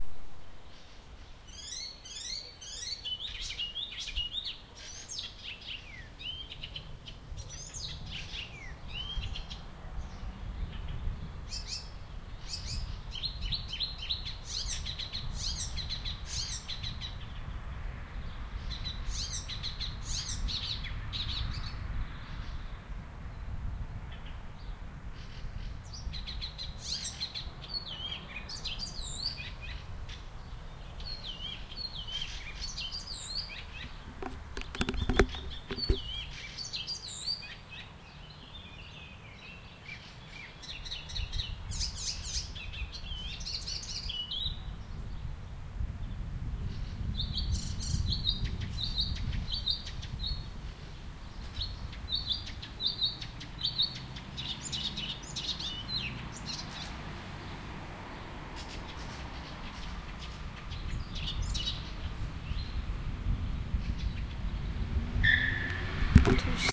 Juri nie oszczędza gardła w niedzielne popołudnie
This is my favourite bird - hippolais icterina which is singing near my house. It was recorded in Kielce, in Poland with Zoom H2N (XY).
nature, birdsong, relaxing, ambient, singing, pajaro, spring, screaming, Hippolais-icterina, animal, birds, field-recording, bird, naturaleza, squeaking